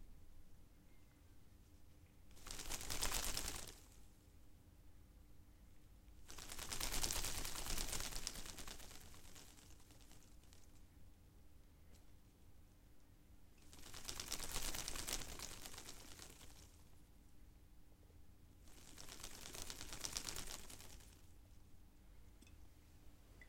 Some recordings meant to work as the flapping of bat wings. To record this, I just used a plant with slightly leathery/plastic-sounding leaves and shook it a few times in front of a mic. Simple but effective!
bat, creature, flap, flapping, flutter, flying, leather, wings